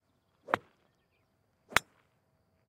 some golf ball hits
hit, sport, ball, golf, swing